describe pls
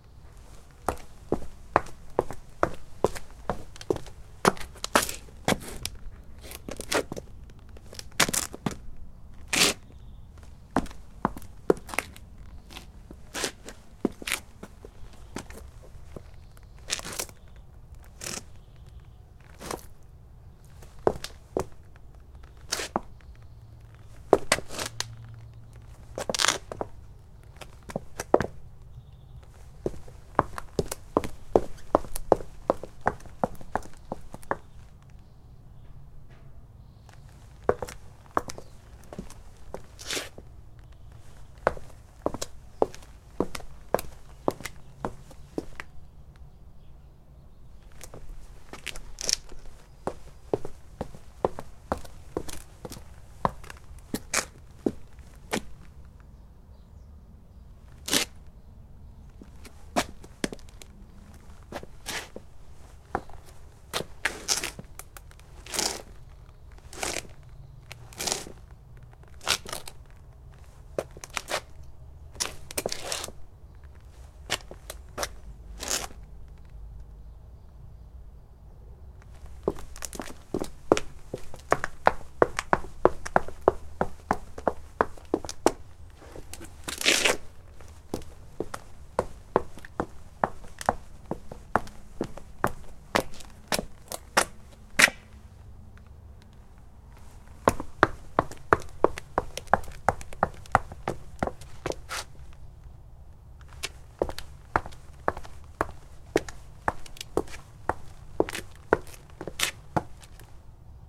sfx lederschuhe auf asphalt 03
Walking on pavement with leather shoes